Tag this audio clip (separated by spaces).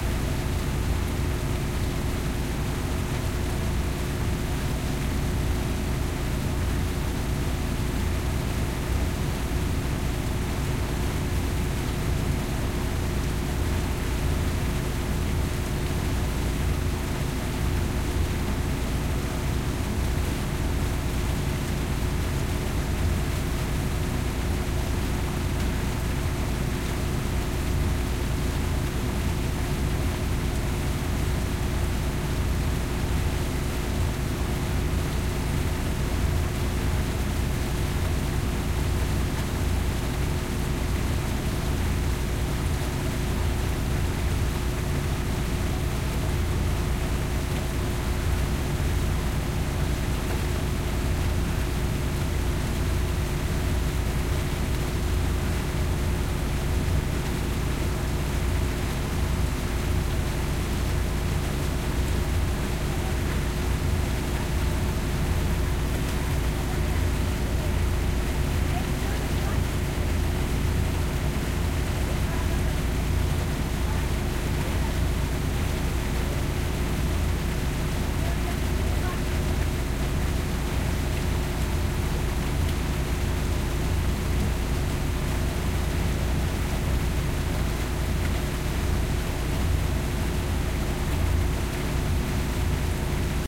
ferry diesel barge boat